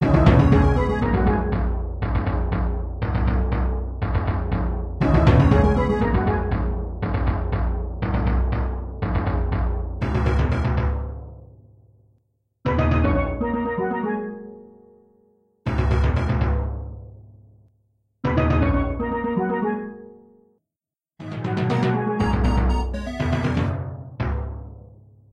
Tropical music loop with heavy beat. Nice for game sound. Created with MuseScore. Minor crude edit done with Audacity.